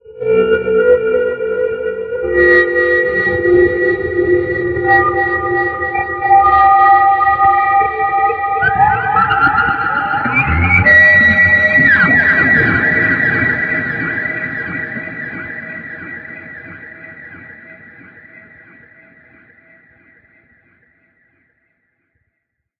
A samurai at your jugular! Weird sound effects I made that you can have, too.